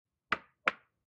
gun cock
pulling the trigger on a gun in the air.
cock, fire, Gun, air, trigger